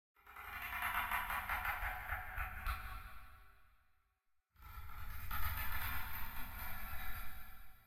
Hollow Door Creak
A door creaking into a spatial, hollow abyss. Spatial depth, large room. Creepy, ominous creak.